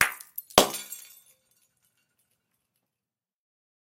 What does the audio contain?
Lightbulb Break 2
A lightbulb being dropped and broken.